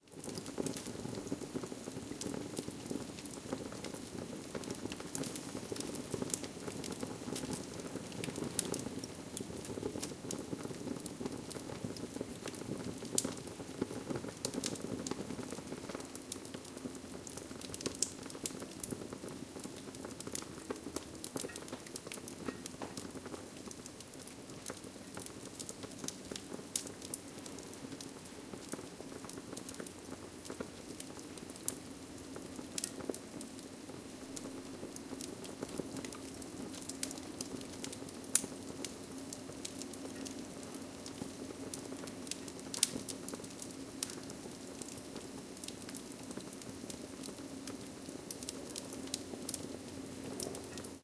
Close up of fire / fireplace in a reverberant room.
fire, fireplace